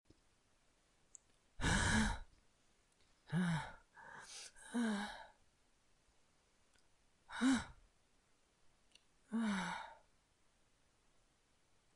free sound, efects exhalación de persona respirando